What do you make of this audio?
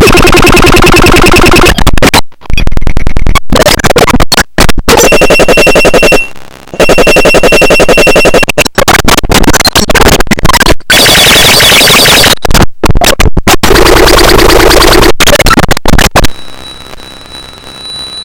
Random Uncut Stuff
bending, circuit-bent, coleco, core, glitch, just-plain-mental, murderbreak